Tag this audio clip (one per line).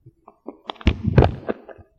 noise
microphone
rattle